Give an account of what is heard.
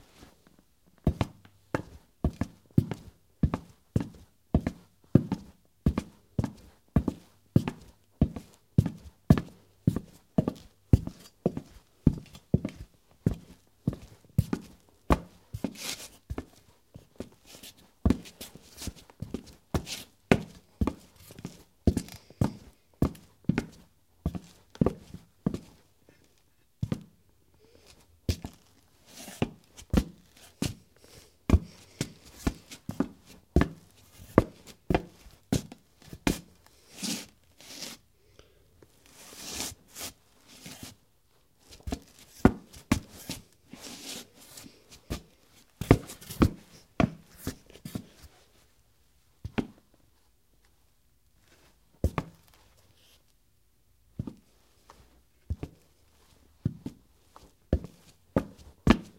Footsteps Cowboy Boots Ceramic Stone Tile
Cowboy boots walking on ceramic tile. Studio created footstep foley.
foley, footsteps, fx, sfx, sound, sounddesign, soundeffects, soundfx, studio